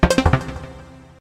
I made these sounds in the freeware midi composing studio nanostudio you should try nanostudio and i used ocenaudio for additional editing also freeware
blip, intro, intros, sound, game, clicks, event, desktop, application, sfx, effect, click, startup, bleep, bootup